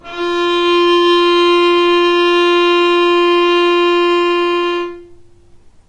violin arco non vib F3
violin arco non vibrato
non, violin, vibrato, arco